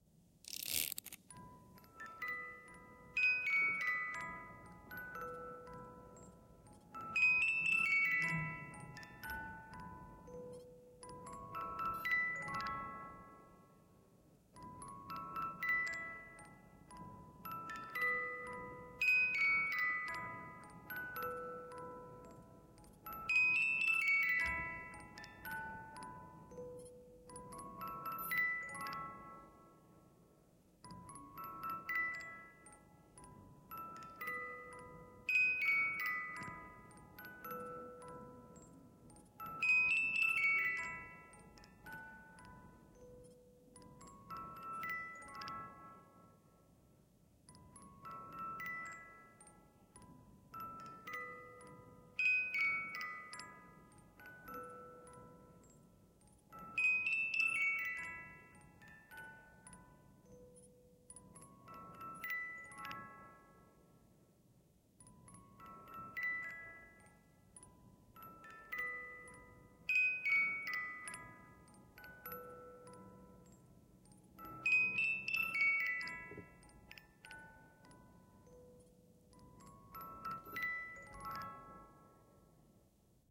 music coming from a baby toy, can be used for creepy or noncreepy purposes.
babies, baby, bell, box, children, childrens, creepy, jack, jingle, lullaby, music, music-box, musicbox, scary, toy, tune